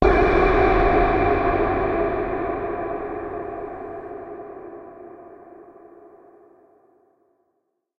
atmospheric noise loads of re verb
techno, atmosphere